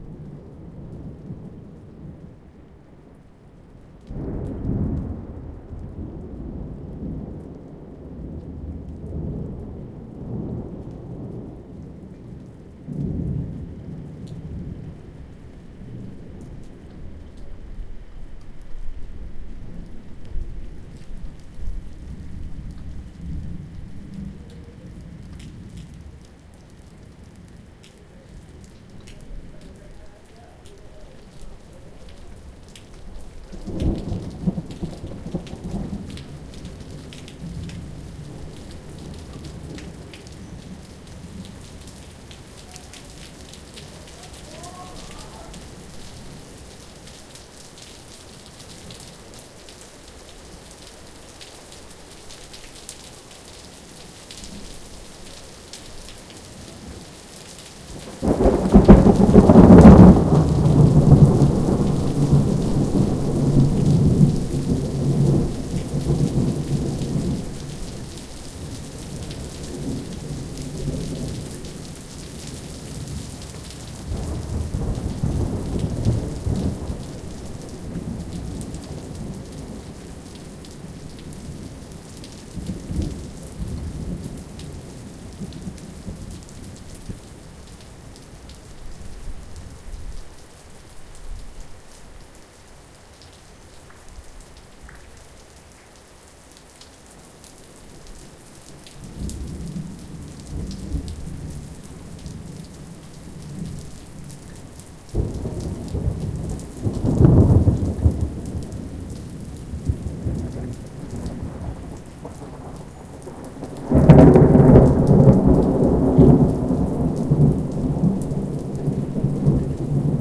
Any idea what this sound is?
Field-recording of thunderstorm starting with heavy rain.
flash, thunderstorm, field-recording, rain, nature, thunder-storm, weather, thunder, raining, storm, lightning